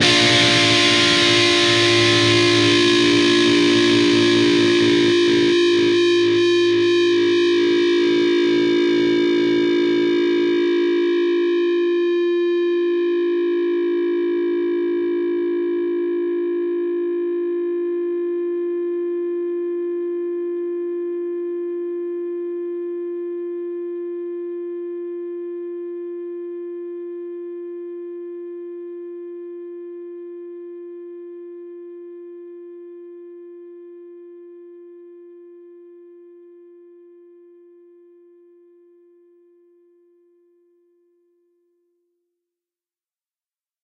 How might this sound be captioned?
Fretted 12th fret on the D (4th) string and the 10th fret on the G (3rd) string. Up strum.